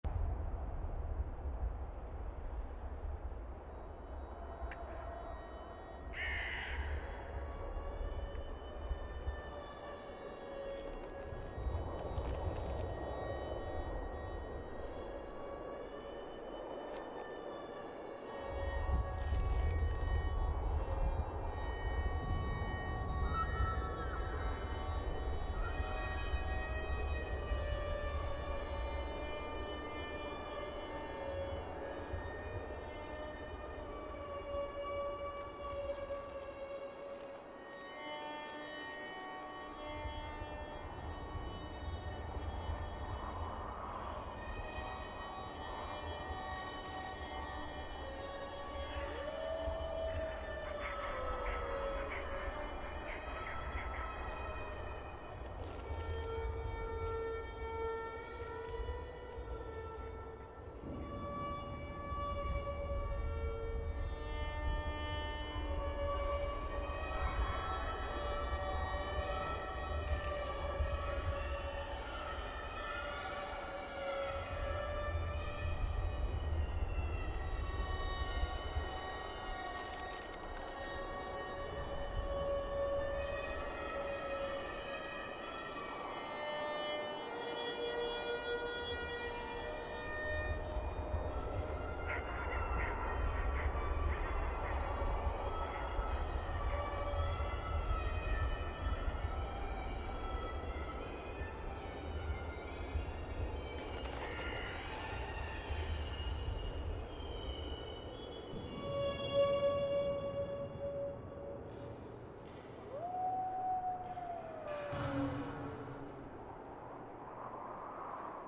I created this music with my violin, perfect for horror stories
haunted, music, terrifying, suspense